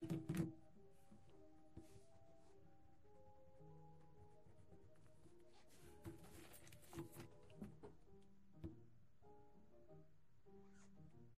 Practice Files from one day of Piano Practice (140502)
Practice, Piano, Logging